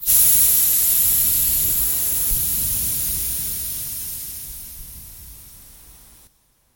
emptying-gas-bottle
gas, noise